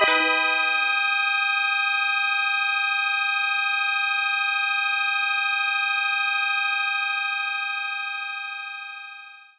PPG Digital Organ Leadpad E5
This sample is part of the "PPG
MULTISAMPLE 002 Digital Organ Leadpad" sample pack. It is an
experimental sound consiting of several layers, suitable for
experimental music. The first layer is at the start of the sound and is
a short harsh sound burst. This layer is followed by two other slowly
decaying panned layers, one low & the other higher in frequency. In
the sample pack there are 16 samples evenly spread across 5 octaves (C1
till C6). The note in the sample name (C, E or G#) does not indicate
the pitch of the sound but the key on my keyboard. The sound was
created on the PPG VSTi. After that normalising and fades where applied within Cubase SX.
ppg, experimental, multisample, digital